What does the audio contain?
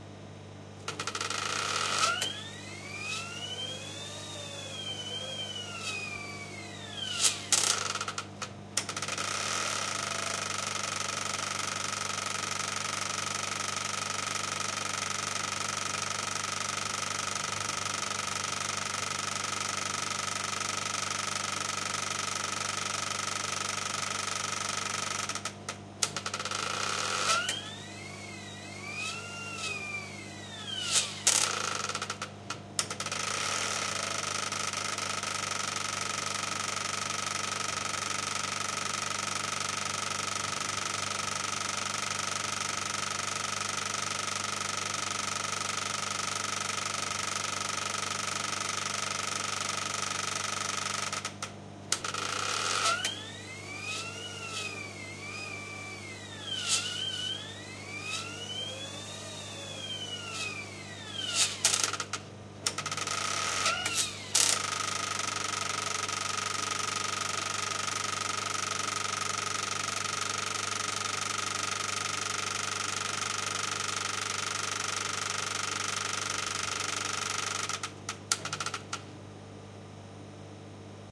tools sondornova mixing 1
SONDOR NOVA, a high speed 35 mm cinema projector, running in a film sound mixing session (Barcelona). Recorded with MD Sony MZ-R30 & ECM-929LT microphone.